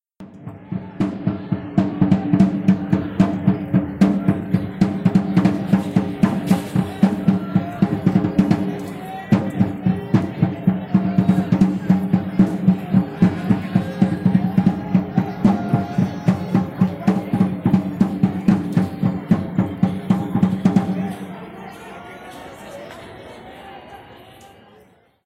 tambor mexica
Grabación realizada en el zócalo de la Ciudad de México, en julio 2019. Se escucha un tambor tradicional mexica (huéhuetl) y el rumor de la gente en la plaza.
Recording made at downtown Mexico City, in July 2019. A traditional Mexican drum (huéhuetl) and the rumor of people in the square are heard.
azteca, aztec-rythm, ethnic-drum, mexico, percussion, tambor